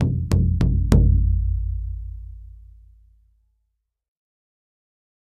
NATIVE DRUM QUADRUPLE STRIKE 04
This sample pack contains 9 short samples of a native north American hand drum of the kind used in a pow-wow gathering. There are four double strikes and five quadruple strikes. Source was captured with a Josephson C617 through NPNG preamp and Frontier Design Group converters into Pro Tools. Final edit in Cool Edit Pro.
drum, aboriginal, percussion, ethnic, indigenous, indian, first-nations, hand, north-american, native